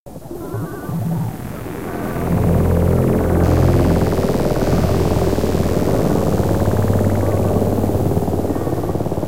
a remix of the shortwave radio pack by Koen- mixed in reason w/ reverbs&chorus